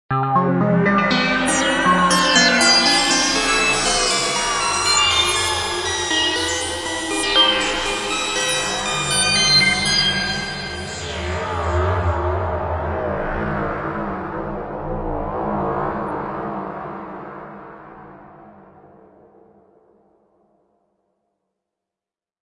eventsounds3 - intros b2
blip, event